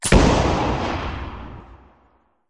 cannon boom10
a metallic click and explosion.
Edited with Audacity